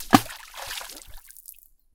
A rock thrown to a lake.
field-recording, lake, plop, splash, water